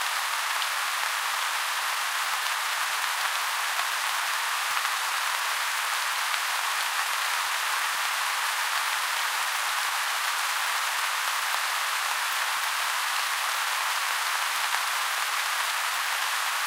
The white noise created by falling rain.